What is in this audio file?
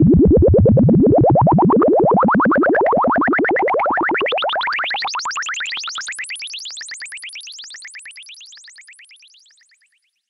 ARP Odyssey bubbles 2
ARP Odyssey percolating sound, starts at mid frequency and climbs into the aether.